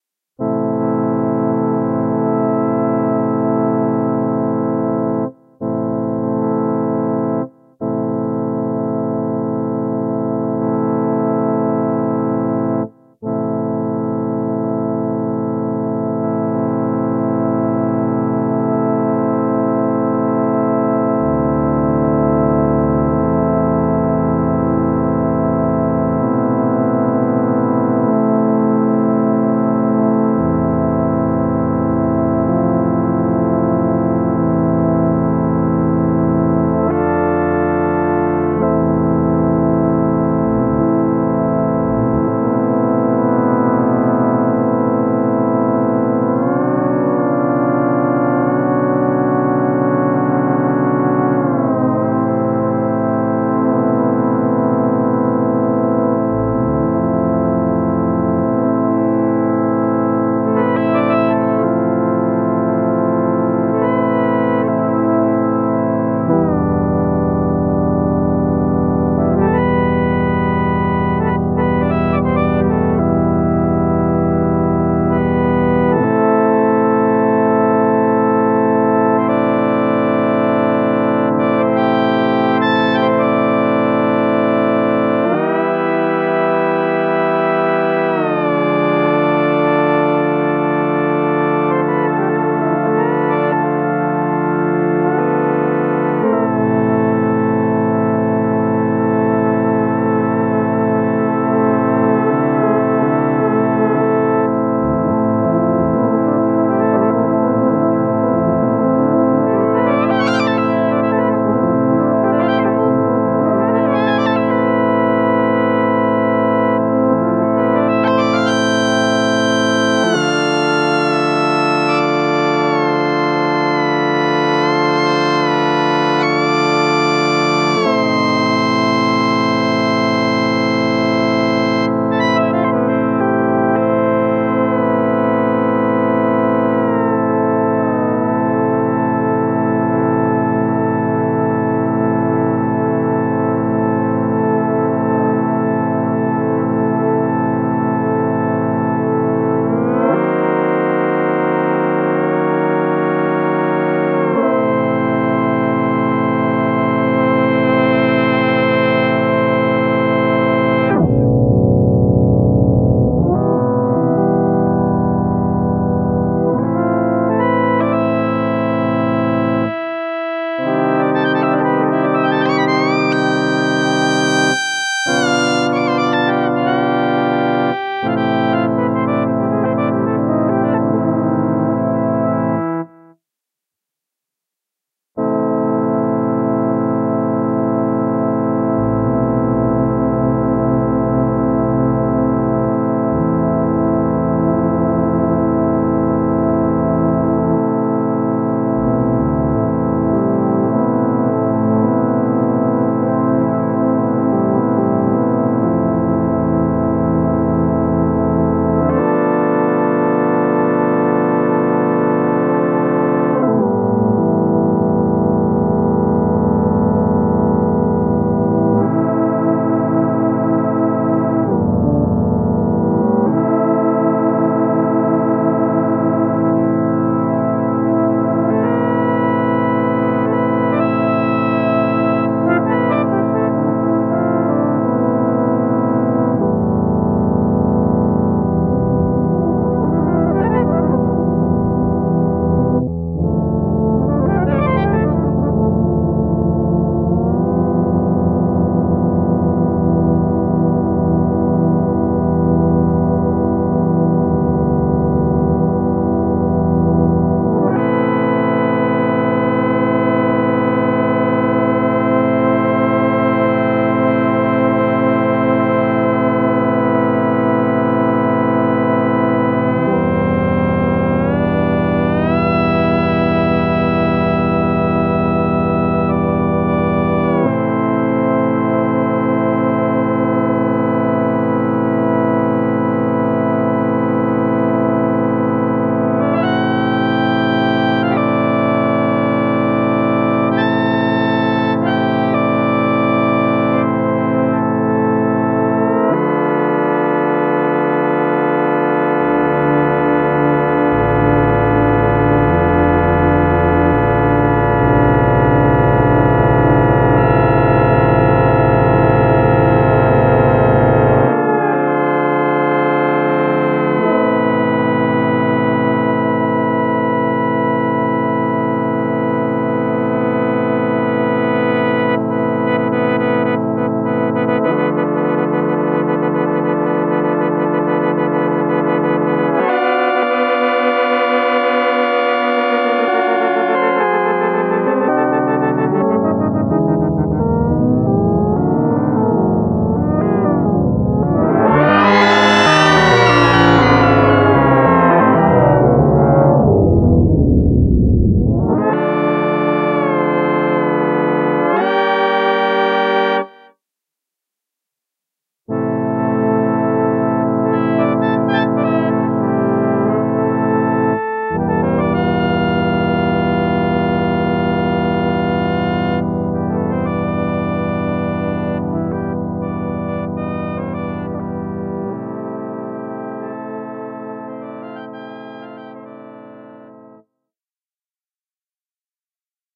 Mugician Synth Jam 2
Made with the Mugician synth app, on an iPad 1.
ambient, atmosphere, drifting, drone, electronic, ipad, lofi, melancholic, microtonal, mood, moody, mugician, multitouch, organ, pad, scifi, synth, synthesizer, vintage